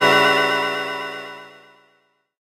PPG 008 Dissonant Space Organ C2
This sample is part of the "PPG
MULTISAMPLE 008 Dissonant Space Organ" sample pack. A short dissonant
chord with a sound that is similar to that or an organ. In the sample
pack there are 16 samples evenly spread across 5 octaves (C1 till C6).
The note in the sample name (C, E or G#) does not indicate the pitch of
the sound but the key on my keyboard. The sound was created on the PPG VSTi. After that normalising and fades where applied within Cubase SX.
chord, dissonant, multisample, organ, ppg